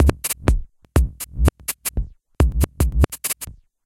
7 drumloops created with korg monotron @ recorded with ableton!